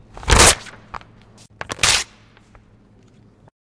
Page Tears
Pages being torn from a magazine.